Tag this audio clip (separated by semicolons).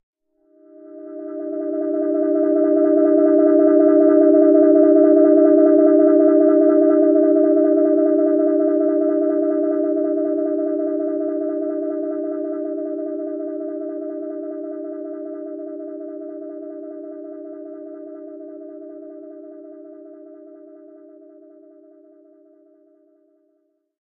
soundeffect; multisample; lfo; ppg